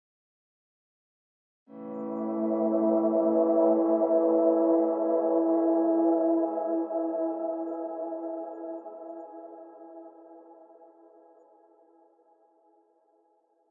guitar pad a minor chord
Electric guitar ran through some vst delay and reverb units. Sounds like a pad.
soundscape, reverb, delay, athmosphere, pad, electric